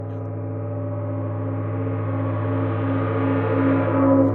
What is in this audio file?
A processed gong strike sample whose waveform has been reversed